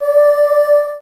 Magic chorus
A sound effect representing an angelical chorus in a game.
Want to show me what you do? I'd love it if you leave me a message